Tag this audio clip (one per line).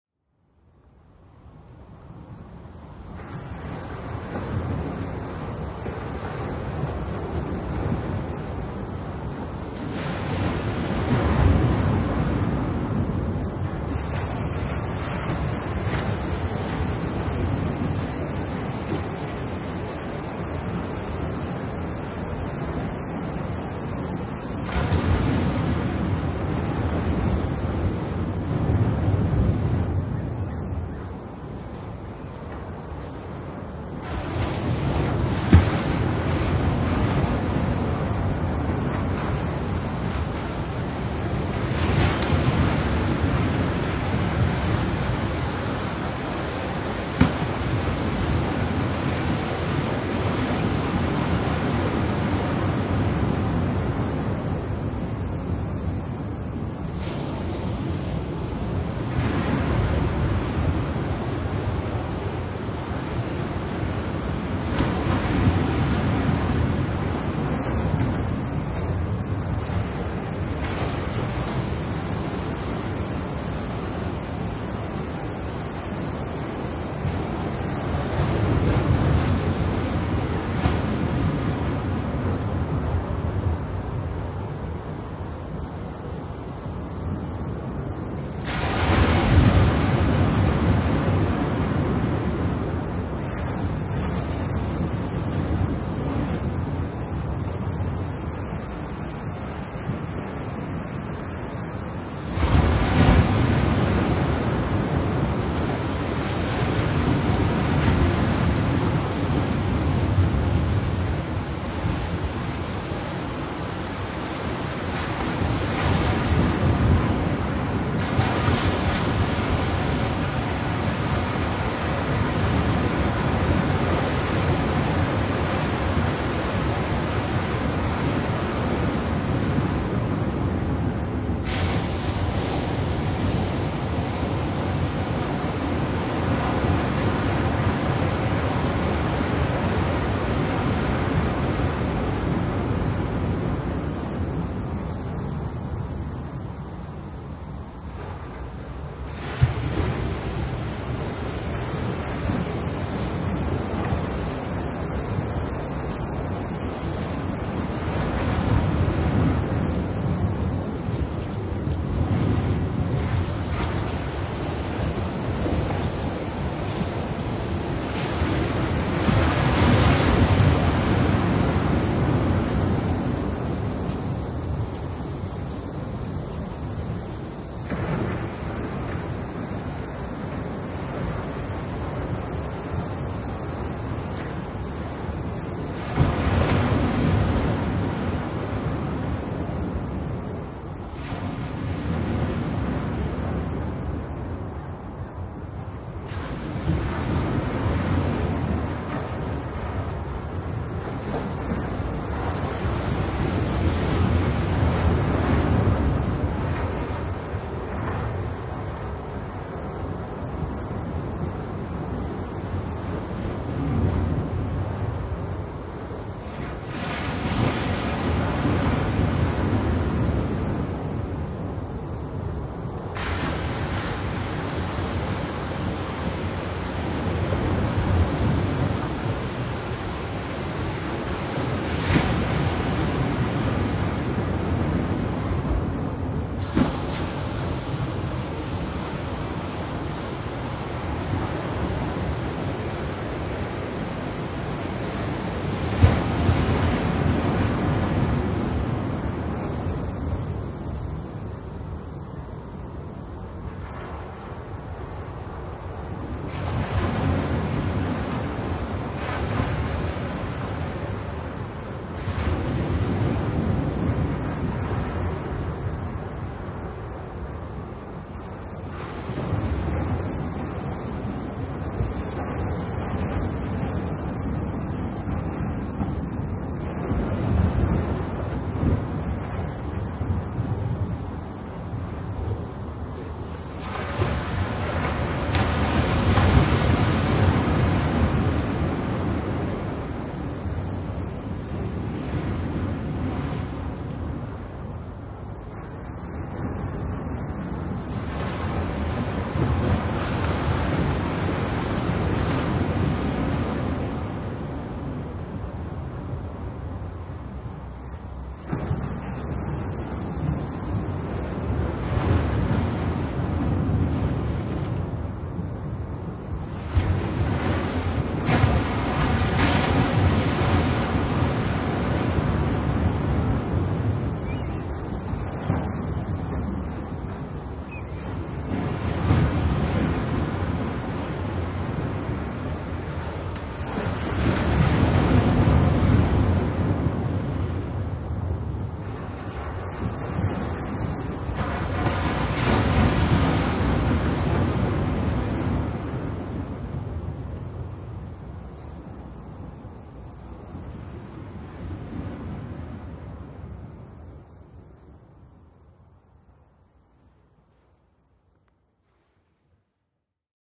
beach
crashing
splashing
waves